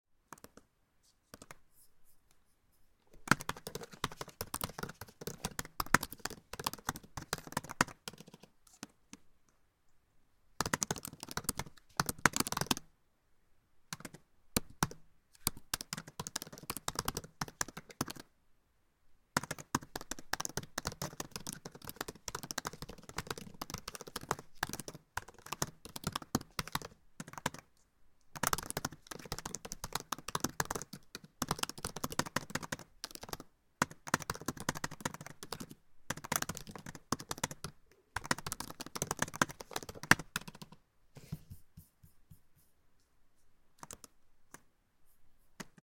Typing and Using Trackpad on MacBook Pro 20181102
keystroke, PC, computer